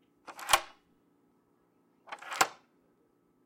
deadbolt;lock
Using a deadbolt.
deadbolt, lock, unlock